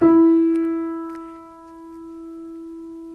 piano note regular E
e, note, piano, regular